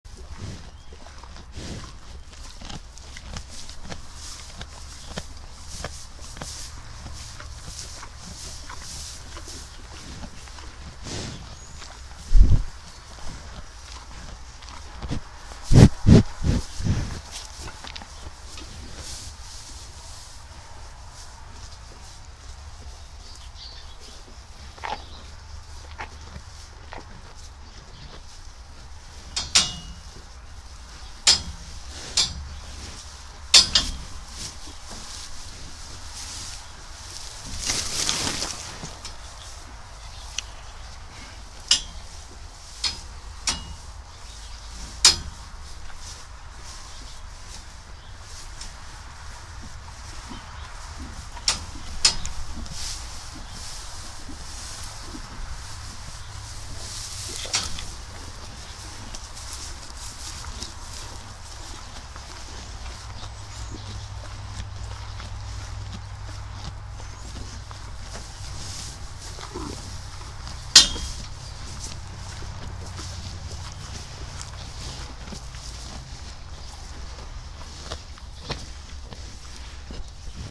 Cows muching
Cows munching on hay in head locks
chewing, hay